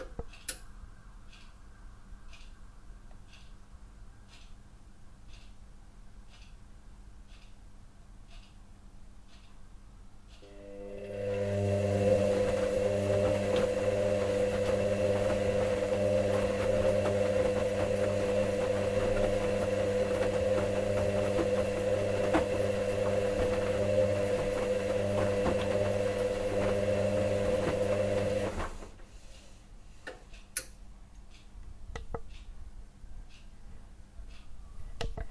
Washing machine (clock)
Washing machine on a first cycle.
washing-machine, laundry